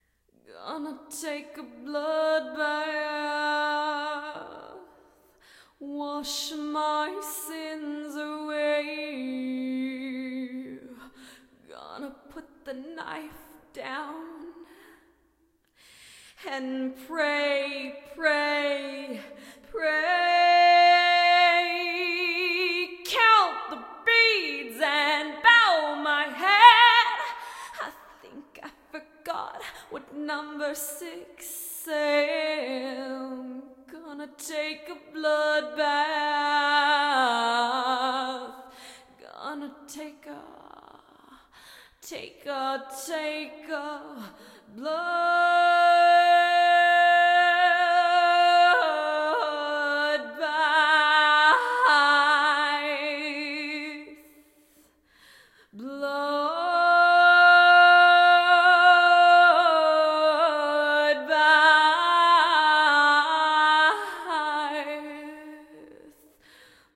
macabre female vocals
Vocal track clip taken from a song I made a while ago called "blood bath" (lyrics and complete track on my homepage). It's sort of a narration of insanity followed by religious guilt, so it's a bit dramatic heh.
I recorded this with my C3 mic, UA4FX audio interface, using sonar LE and cut it up in Ardour.
Chop it up, throw it in a blender, do whatever you like with it.
sing, dark, blood, catholicism, vocal, female, macabre, goth, insanity